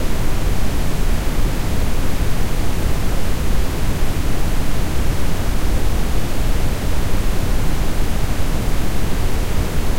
berechnet mit MAGIX Samplitude 10
brown, HQ, noise